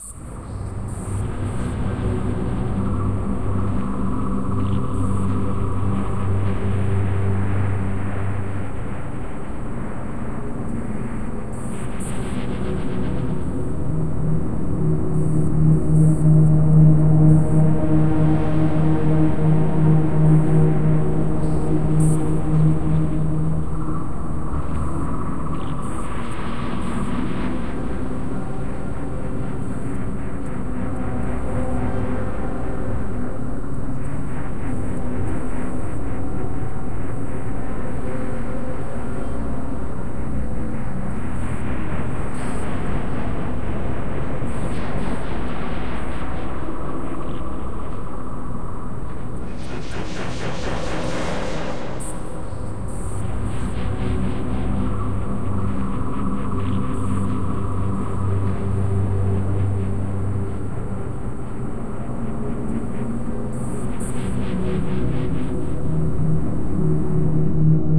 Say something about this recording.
Jungle night dark voices atmo